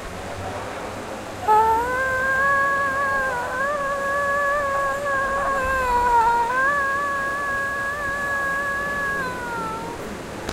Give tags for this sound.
Texture
Station
Bukit-Bintang

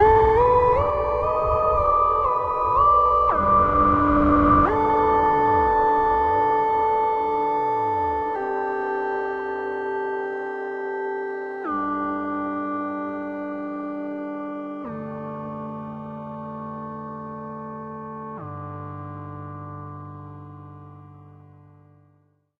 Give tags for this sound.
drone
clean
melodic

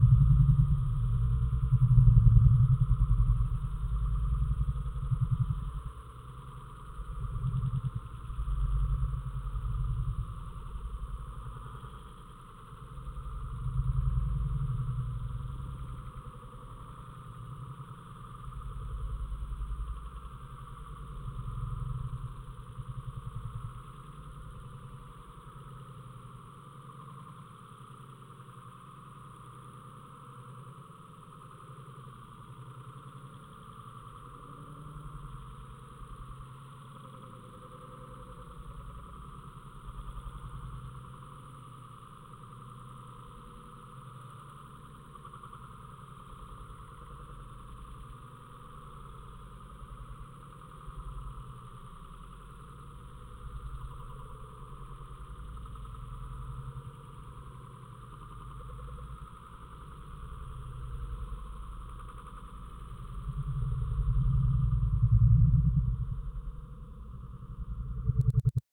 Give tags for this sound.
edited
fan
field-recording

